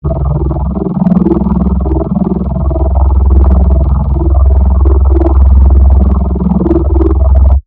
Water / Industrial
Underwater industrial sounds created with Toxic Biohazard in FL Studio 11 and mixed with parametric eq, multiband compression, and a vocoder. Sample is unmastered. Screenshot of setup available.
atmosphere, atmospheric, bubbly, fluid, hazardous, industrial, industry, liquidy, machine, machinery, sonic, sound, system, toxic, water